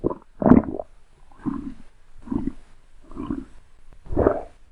eaten by monster1
eat, eating, evil, horror, meal, monster, swallow